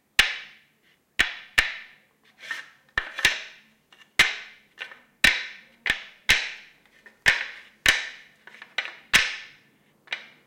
sound made with two pieces of very dry and hard wood. Sennheiser K6-ME62 + K6-ME66 > Shure FP24 >iRiverH120, unprocessed / golpeando dos trozos de madera